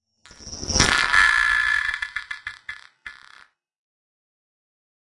Attacks and Decays - Single Hit 6
Very similar to "Single Hit 3" with the AM frequency modulated. Band-pass like timbre with very short attack followed by long processed decay tail. Very slow AM (sub-audio frequency) applied throughout.
electronic, experimental, hit, sfx, spectral, synthetic